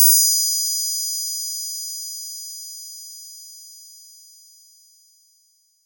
Mini-Cymbal-D3-1
Clean and long synthetic mini-cymbal in D (hi octave) made with Subtractor of Propellerhead Reason.
cymbal, drum, drums, percussion